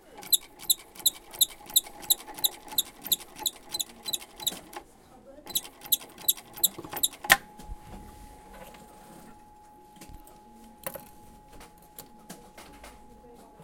Queneau machine à coudre 44
son de machine à coudre
machinery, coudre, POWER, machine